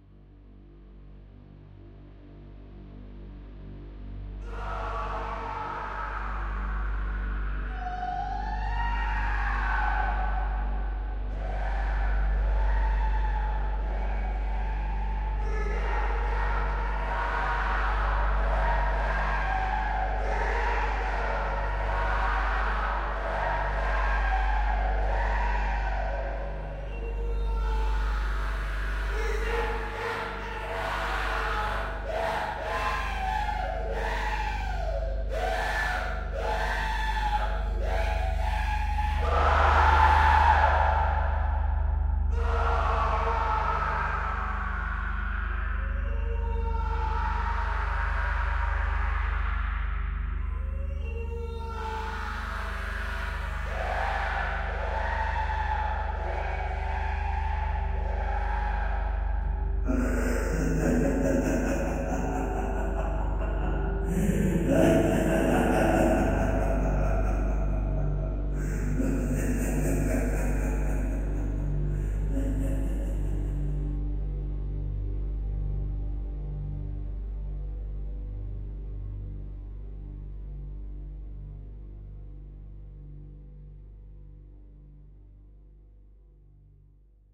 A horror soundscape I created from Digifishmusic's ambiances, Timbre's psychotic laugh, and Syna-Max's horror screams.
haunted, horror, prison, suspense, tense, terror